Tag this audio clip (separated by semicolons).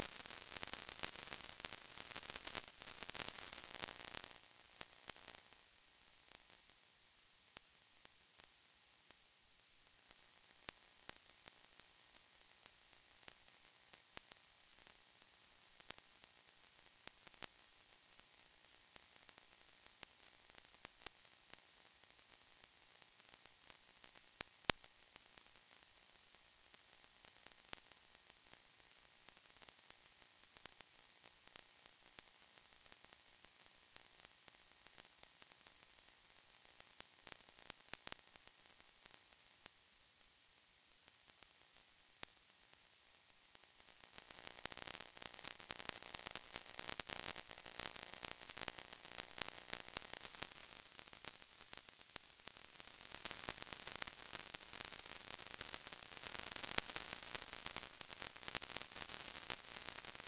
interference; radio; static